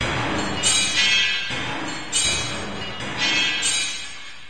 industry smack
Industrial ambience created on the Korg M1.